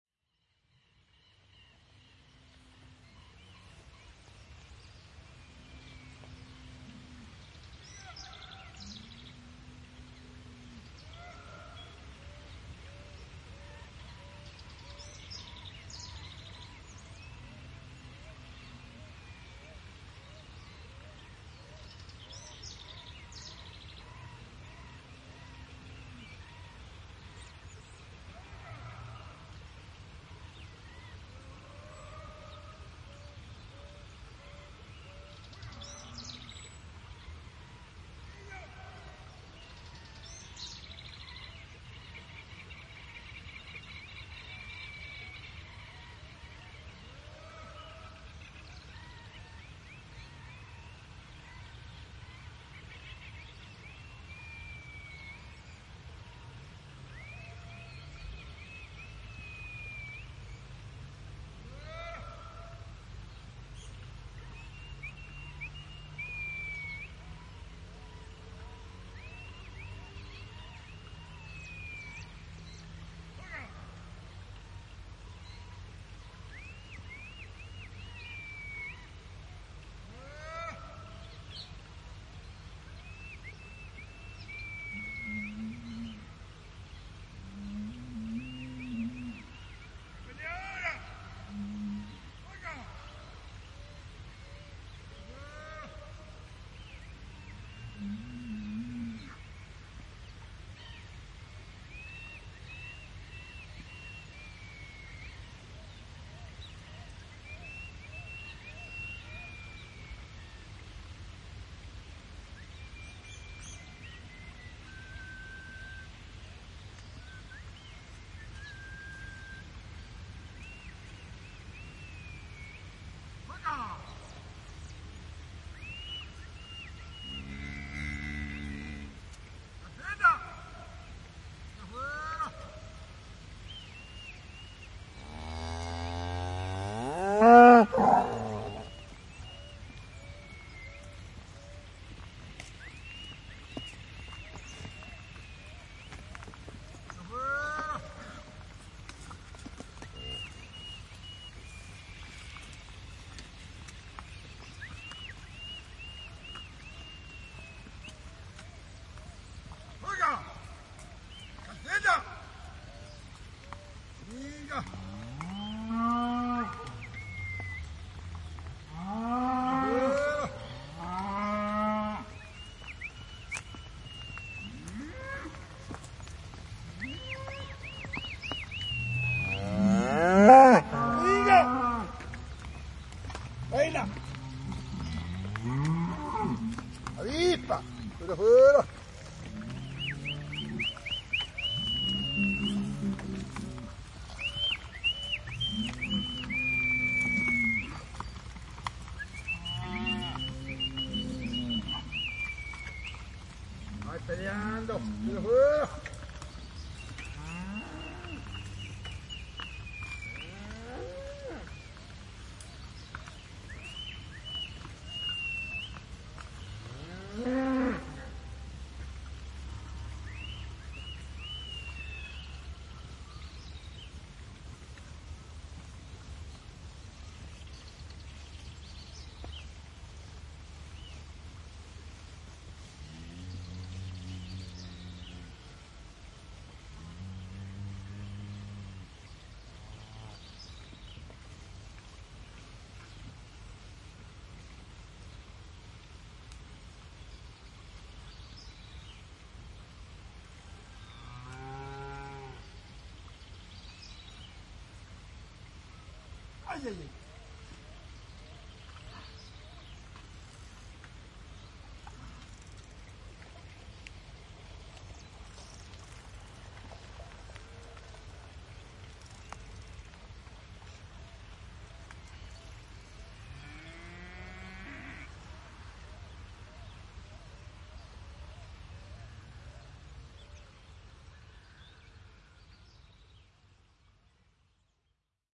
A cowherder herding cattle through a dirt road. He vocalises and cows respond mooing. Recorded on a MixPre6 with Usi Pro microphones. You can also hear the general morning rural summer ambience.